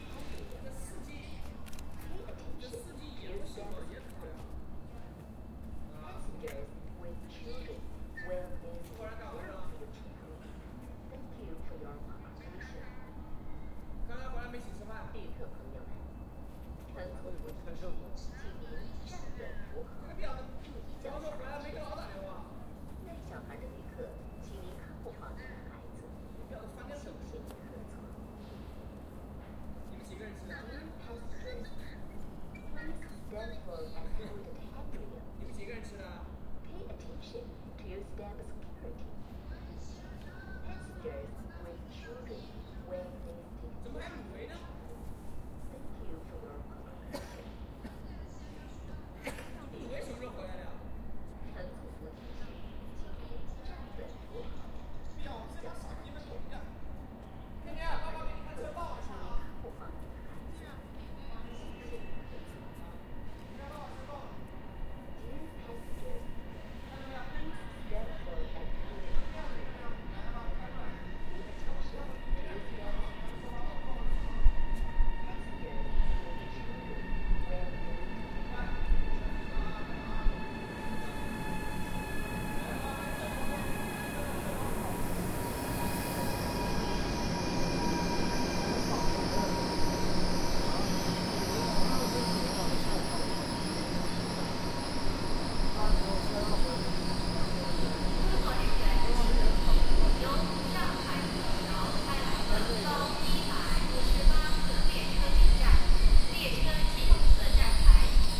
Bullet train arriving at station
Bullet train arriving at Cangzhou station late at night. Recording stops soon after arrival as I had to get on.
bullet-train, china, Cangzhou